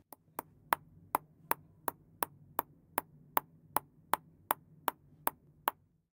Bolinha de ping pong